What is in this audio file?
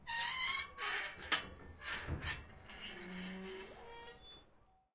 Alanis - Chapel's Gate - Cancela de la Ermita (I)
Date: Feb. 24, 2013
This is the sound of the gate of a chapel called 'Ermita de las Angustias' in Alanis (Sevilla, Spain).
Gear: Zoom H4N, windscreen
Fecha: 24 de febrero de 2013
Este es el sonido de la cancela de una ermita llamada "Ermita de las Angustias" en Alanís (Sevilla, España).
Equipo: Zoom H4N, antiviento
ermita, door, puerta, Spain, creak, Sevilla, Alanis, nature, rusty, chapel, naturaleza, Seville, gate, Espana